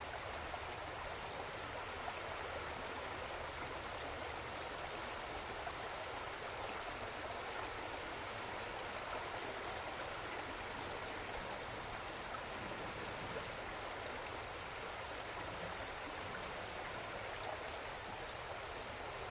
Short recording of Putah Creek outside of Davis, California. Mostly just water, but also a few birds. Recorded on a Zoom H4N.